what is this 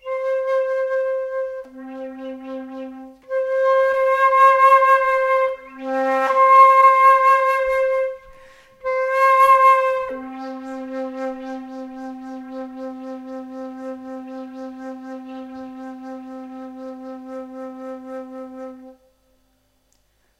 Flute Play C - 18
Recording of a Flute improvising with the note C